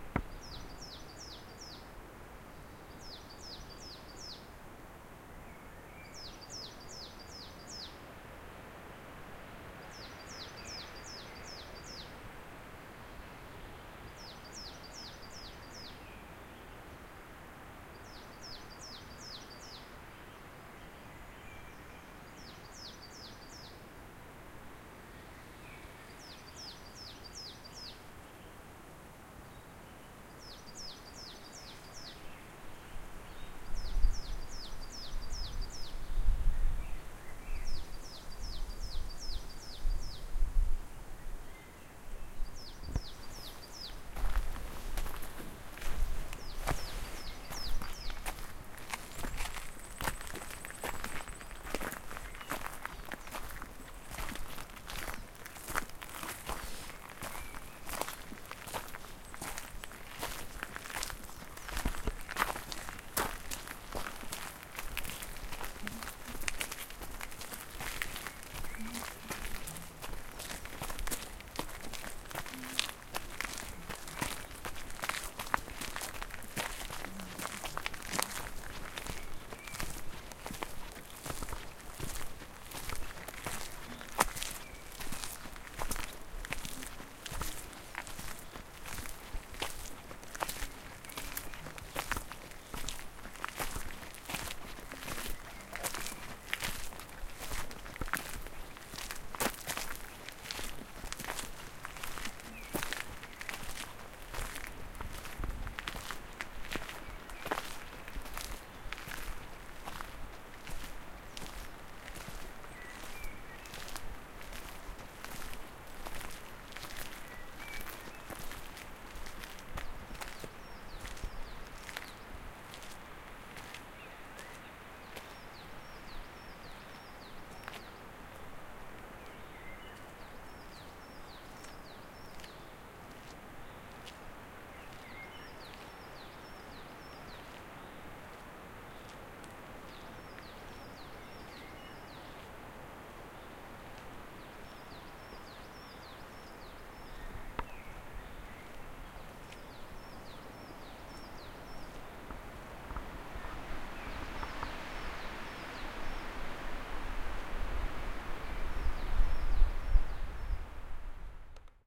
walking in the woods
Two people listening to the sound of the forest, birds and wind, then walking for a moment, a woman is singing silently. Recorded with Zoom H4N and normalized.
ambience, birds, field-recording, footsteps, forest, singing, walking, wind, woman, woods